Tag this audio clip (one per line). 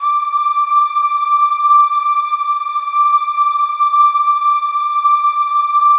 d5
flute
pad
swirly
stereo